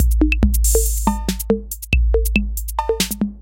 70 bpm drum loop made with Hydrogen
beat, electronic